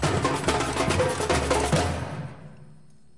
thats some recordings lady txell did of his percussion band "La Band Sambant". i edited it and cut some loops (not perfect i know) and samples. id like to say sorry for being that bad at naming files and also for recognizing the instruments.
anyway, amazing sounds for making music and very clear recording!!! enjoy...